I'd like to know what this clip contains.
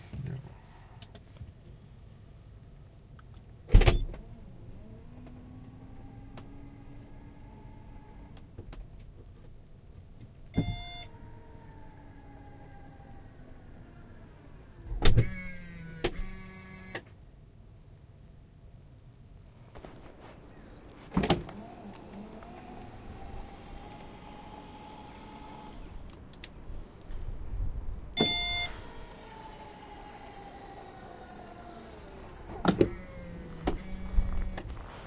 auto - takakontti - avaus ja sulkeminen sisalta ja ulkoa - car - back trunk - opening and closing interior and exterior electronic mechanism
Auton takakontin avaus sisältä ja ulkoa, paikka: Riihimaki - Suomi aika: talvi 2016 car backtrunk opening and closing inside and outside, place: Riihimaki - Finland Date: winter 2016
car, close, exterior, field-recording, interior, open, trunk